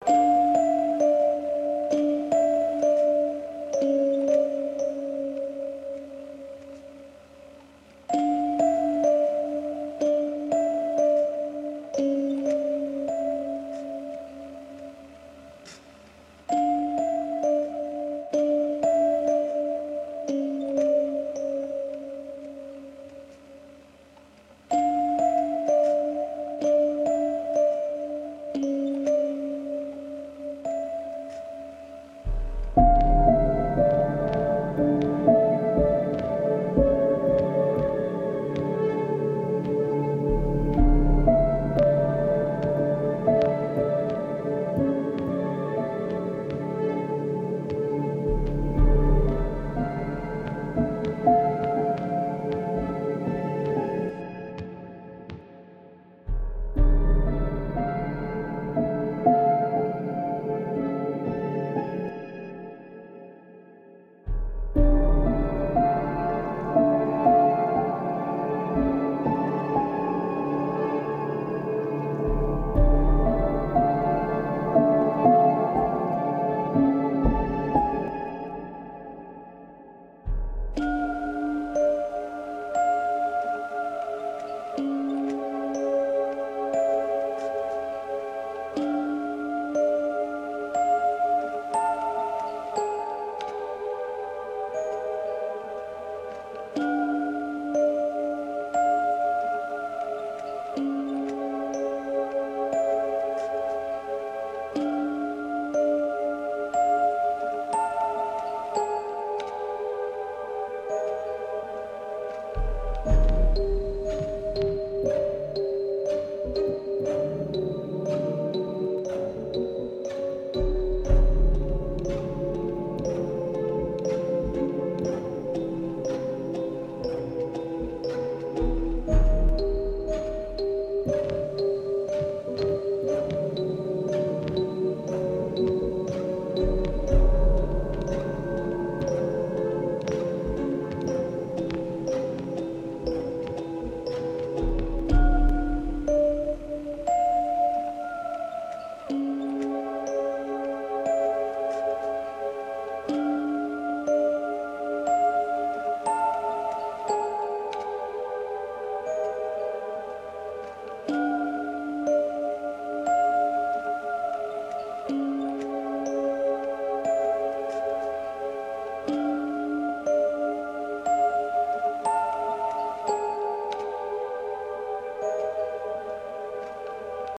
Horror Music
Genre: Who knows.
Track: 71/100
Emotional washed pads.